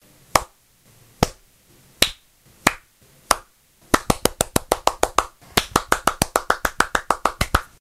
A sound effect of different claps